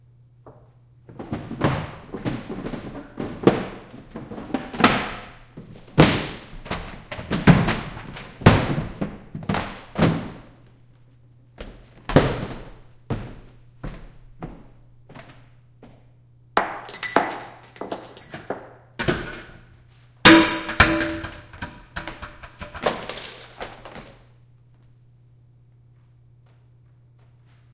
room ransack 1
A recording made of the stage manager and director pretending to be nazi officers searching for valuables in an apartment on the set of a production of The Diary of Anne Frank.
Stomping, knocking things over, pushing things around. Opening and closing drawers, but not actually breaking anything.
(recently renamed)
ransack, silverware, violence, frank, clatter, anne, crash, stomp, plastic, tableware, glass, bowl